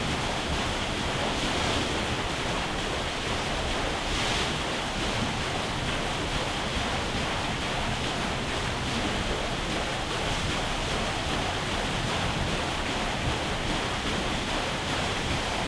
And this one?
This is the sound of the water wheel at Sarehole Mill, Birmingham.